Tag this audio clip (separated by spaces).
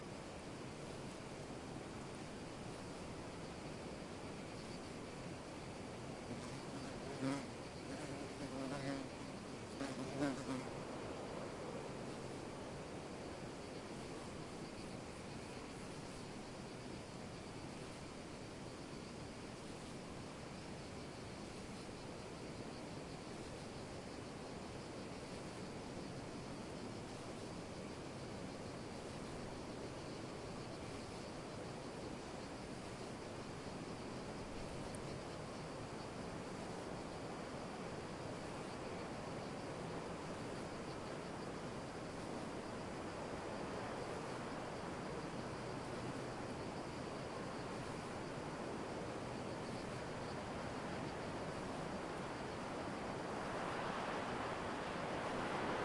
park crickets bumble nature biokovo solitary summer hot atmo national bee field-recording wide-angle barren wilderness